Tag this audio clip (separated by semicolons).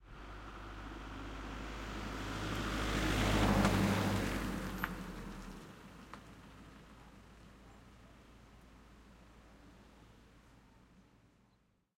auto; car; pass; road; street